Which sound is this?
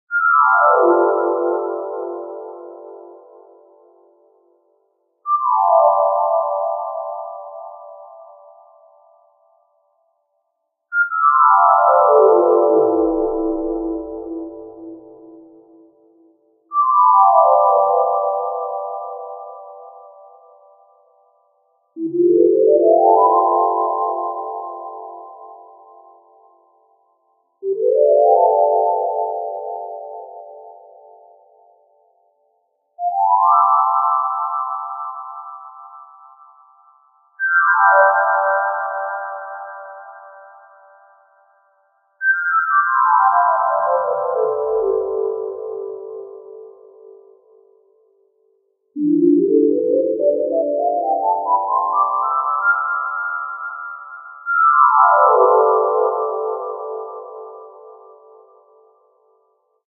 Flashback Synth
A series of synth sounds that can be used to precede or end a flashback or dream sequence in your project. Created in Soundtrap.